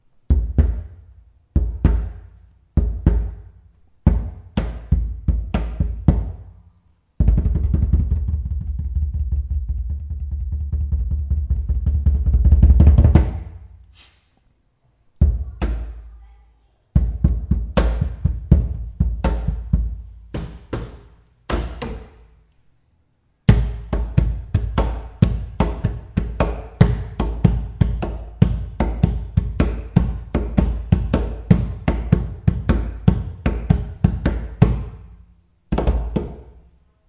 Found a plastic barrel in hotell. It was filled with water on half. Take hands on it. I'm not a good player but like the sound it makes.
percs, drums, breakbeat, garbage, container, improvised